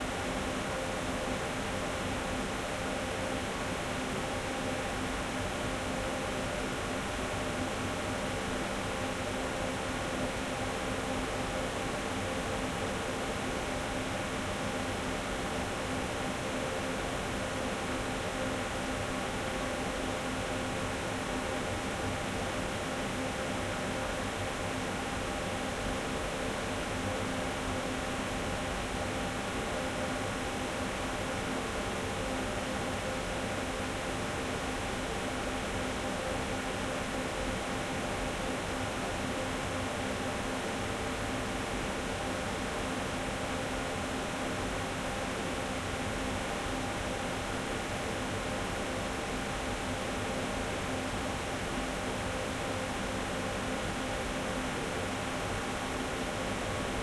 server room binaural
Just a simple binaural recording of a post-production facility's server room. No filtering whatsoever.